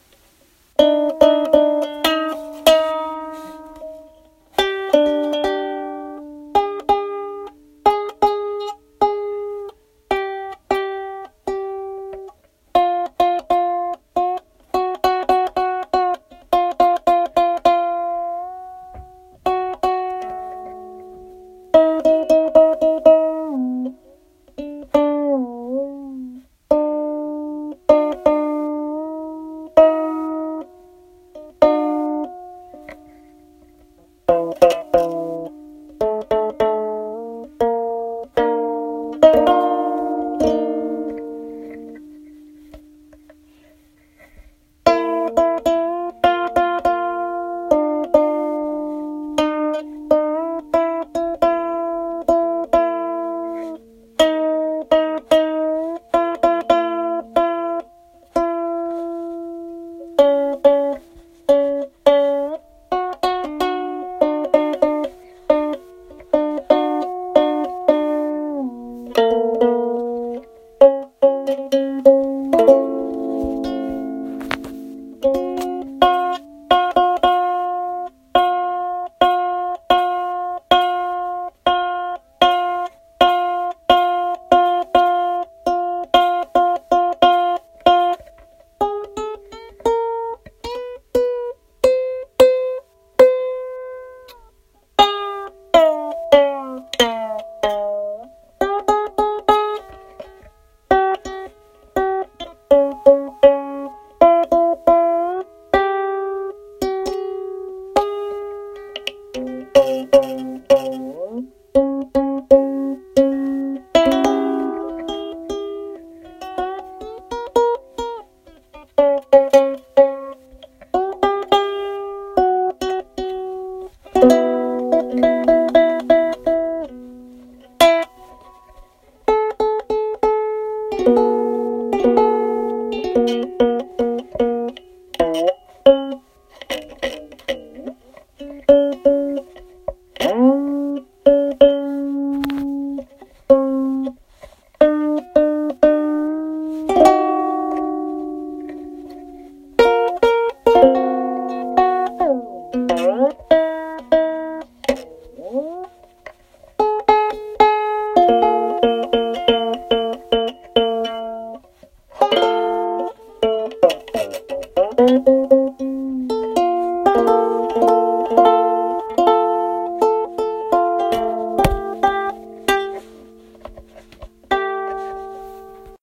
Tuning a Ukelele